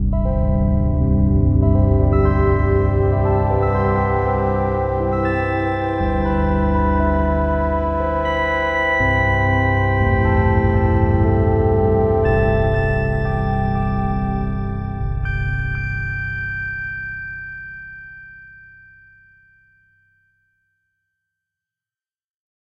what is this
eventsounds3 - intros a
I made these sounds in the freeware midi composing studio nanostudio you should try nanostudio and i used ocenaudio for additional editing also freeware
application, bleep, blip, bootup, click, clicks, desktop, effect, event, game, intro, intros, sfx, sound, startup